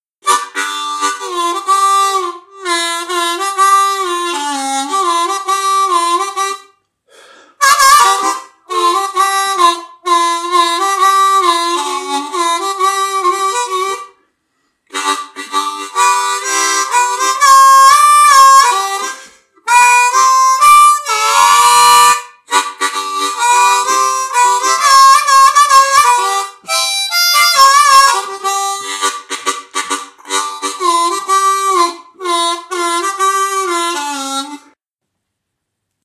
Harmonica blues
Date: 29/9/2017
Location: Hamilton, New Zealand
Played this piece with a Marine Band Hohner in the key of C.
This was recorded using Audacity with MacBook Pro's built-in microphone.
I used Audacity's Noise reduction feature to drown out the noise in the
background.